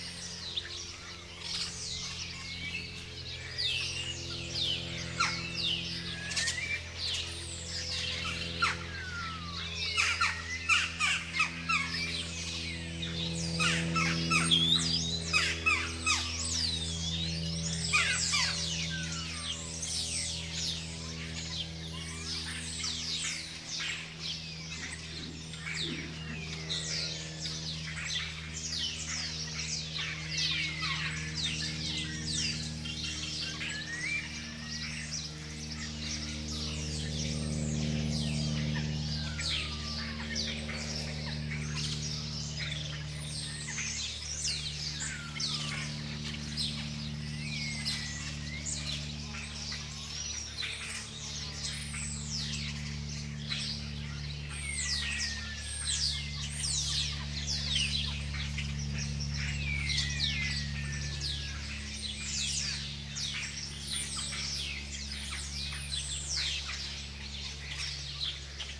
20060426.marsh.house.ambiance
thrushes, jackdaws, house-sparrows and other birds calling. Airplane in BG. Sennheiser ME62 into iRiver H120 / tordos, gorriones, grajillas y otros pajaros. Avioneta al fondo
ambiance, nature, field-recording, birds, house, airplane, jackdaw, spring, donana